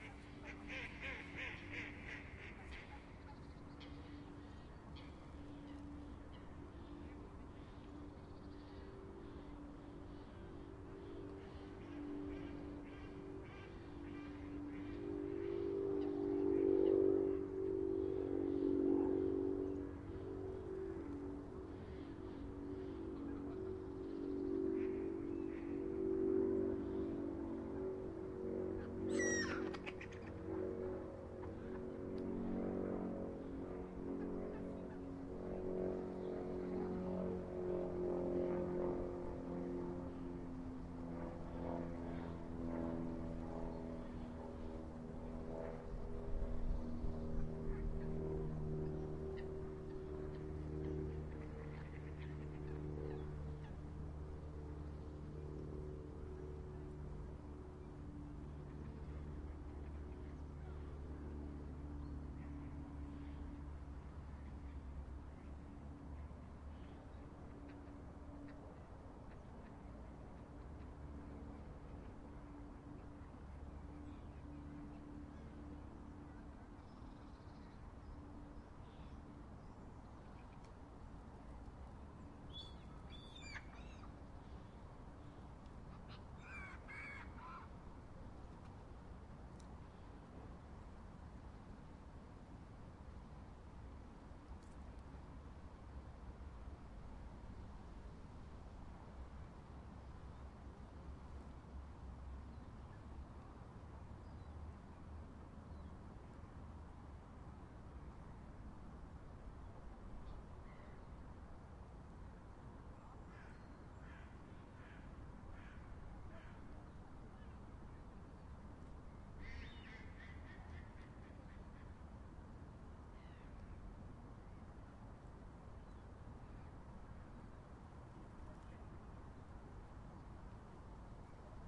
motor glider near pond
Just when I started the recording a motor glider flew over the park, where I have set up the Zoom H2 to record them ducks. All that on Xmas Day 2008.
field-recording, park, pond, seagull